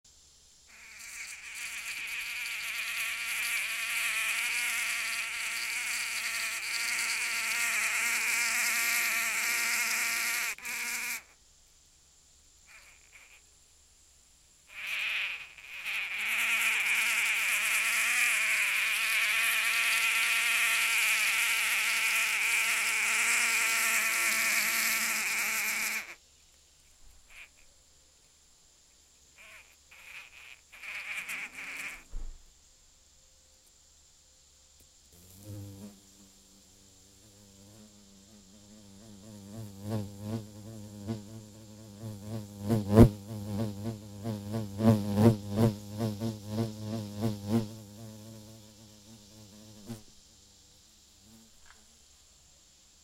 blue mud-dauber wasp
These mud daubers are plentiful around here. This one is building a nest in the garage. I'm sure it'll be packed with spider meals very soon.
Sphecidae, buzzing, wasp, building, Mud-dauber, working, Crabronidae, dirt-dauber, insect, nest